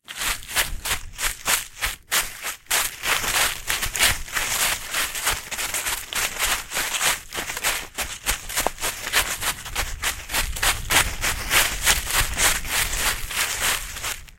Walk Leaves FastSpeed
Me running on leaves in my woods. Recorded with my Walkman Mp3 Player/Recorder. Simulated stereo, digitally enhanced.
foley, leaf, leaves, walk